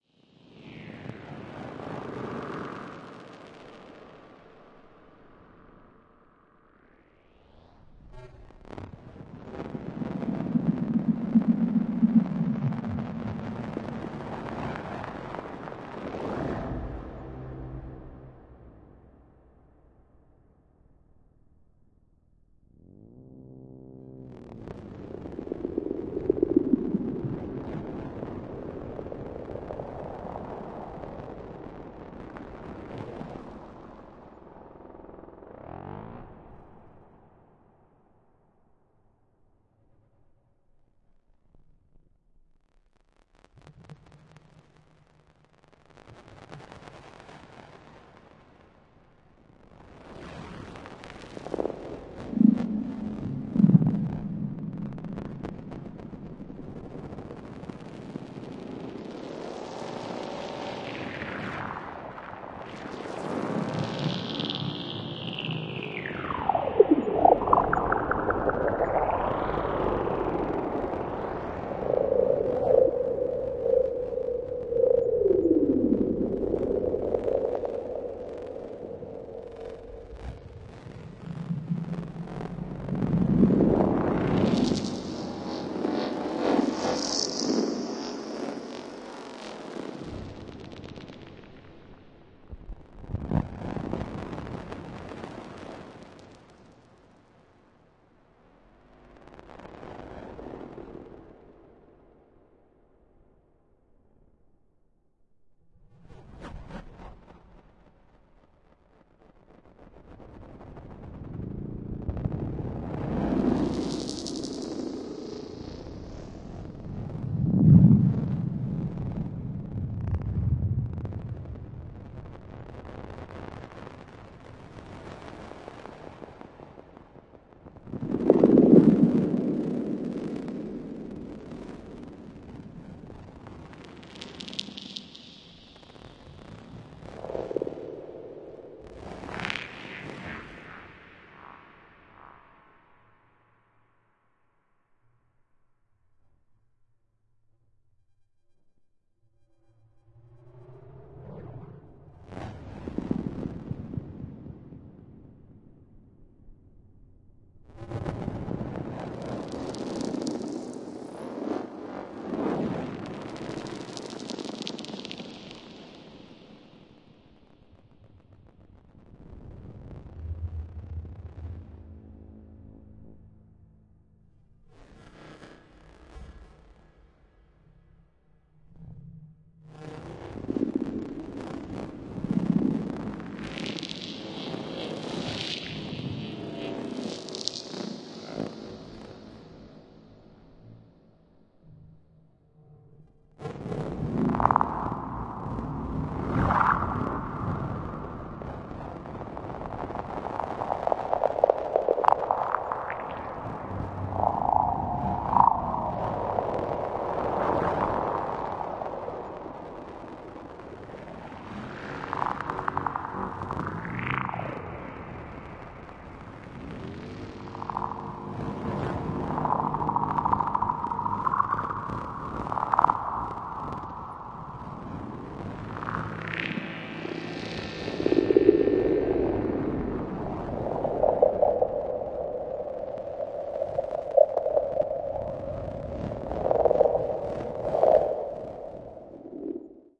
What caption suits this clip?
ESERBEZE Granular scape 07
This sample is part of the "ESERBEZE Granular scape pack 1" sample pack. 4 minutes of weird granular space ambiance.
effect, reaktor